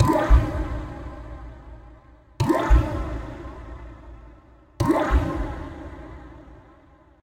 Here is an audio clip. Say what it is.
hiccups of a dinosaur x26Li2f
For it is today impossible to record the hiccup of a dinosaur, I tried to rebuild a sound that might be similar. You will decide if I was successful.